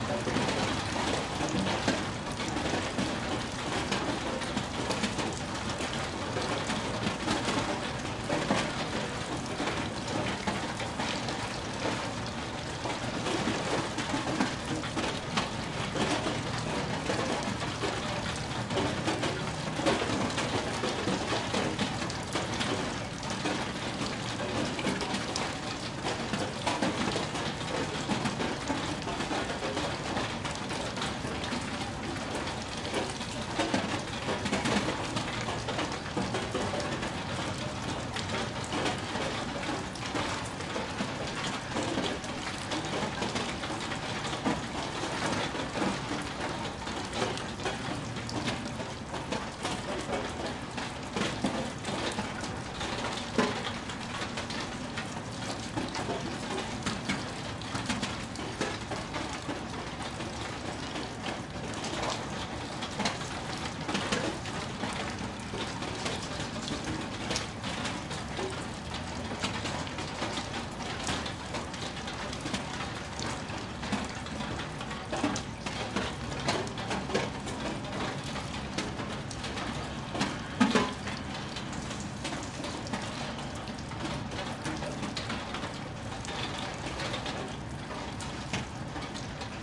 There is raindrops falling on metal roofing after the rain.